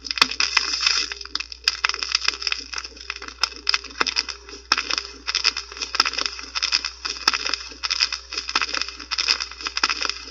MACHINE, VINYL CRACKLE
At the end of a record, the vinyl record makes a crackle. The machine is a Sanyo Phonosphere Model RPT 1200. Recorded with a CA desktop microphone.
machine, vinyl, old, static, vintage, vinyl-crackle, surface-noise, record, turntable, noise, crackle